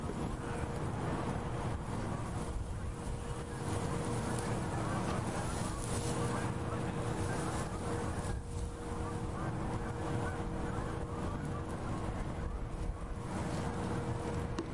A recording of wind in the grass with some geese flying overhead.
Natural, Nature, Windy